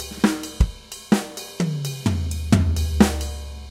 Sommerfrost Drums HQ 130 BPM 5
drums, jazz, kick, ride, rock, snare